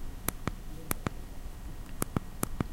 Dare12 2 handy
Typing numbers in my mobile phone.
typing, dare-12, mobile-phone